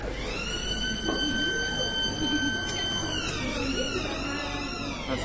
The siren that signals noon in many construction sites. Recorded in Seville near the market of Feria St, during the filming of the documentary 'El caracol y el laberinto' (The Snail and the labyrinth) by Minimal Films. Shure WL183 into Olympus LS10 recorder.